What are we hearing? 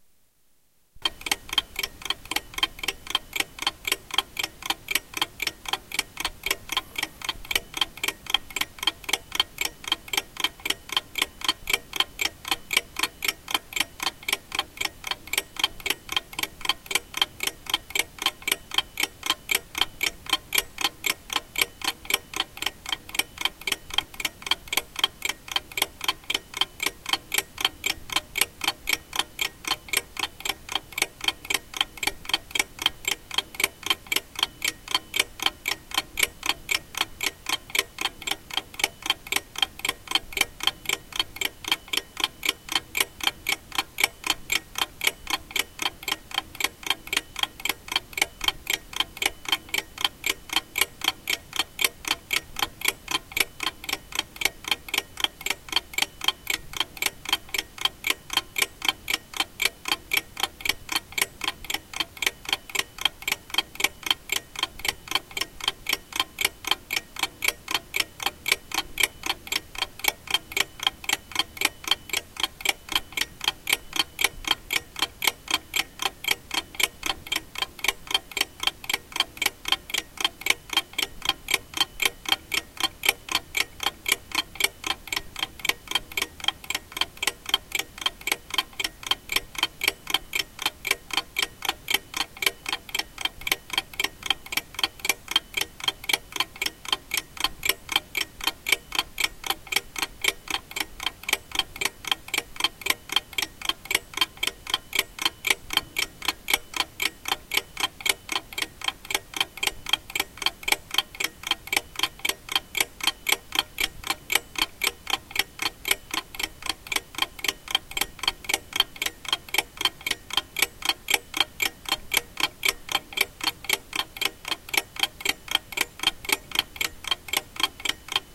the ticking of an old alarm clock, recorded in the middle of the night
clock, alarm-clock, ticking